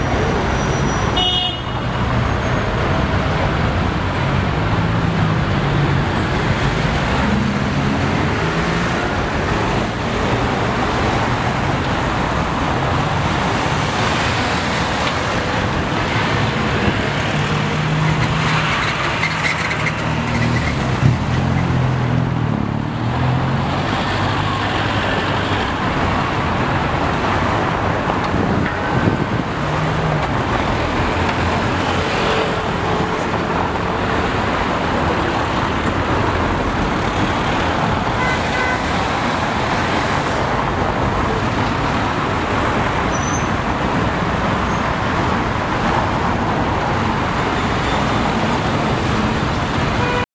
Paris traffic
This is a field recording of one of biggest avenue in Paris